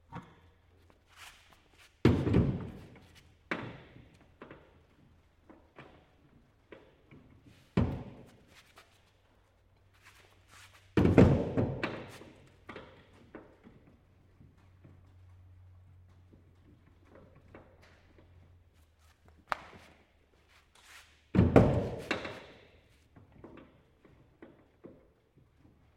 Metallic bangs & footsteps in large shed
Loading up a small metal dinghy boat or tinnie and then mounting an outboard motor to the back. Reverb from workshop or shed surroundings.
Alex Fitzwater/needle media 2017
footsteps, plastic, rowboat, tinnie, feet, metallic, engine, tender, motor, walk, impact, foley, bang, work, toolbox, hardware, dinghy, outboard, clang, tools, shed, trunk, metal, workshop, case, tool